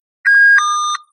ticket machine 3
ticket machine in istanbul